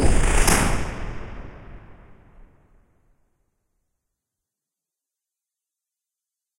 Something very mechanical sliding in a hangar
close, door, echo, fx, mech, mechanical